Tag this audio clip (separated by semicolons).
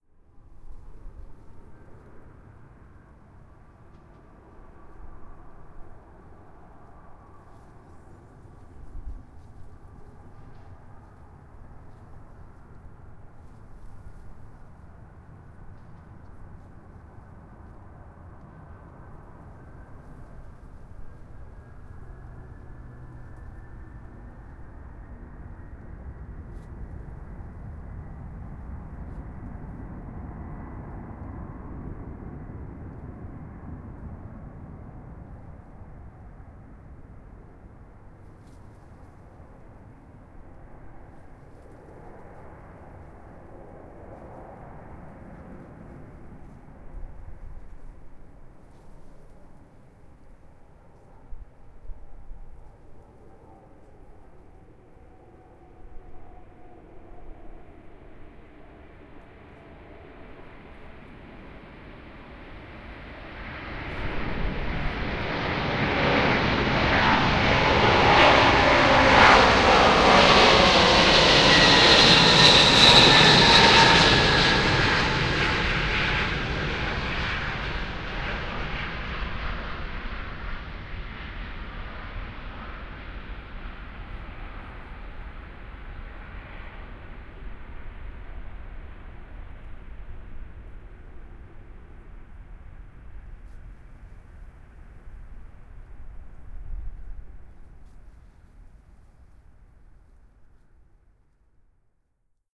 airplane; airport; aeroplane; takeoff; flight; cargo; jet; runway; engine; field-recording; take-off; aircraft; antonov; aviation; plane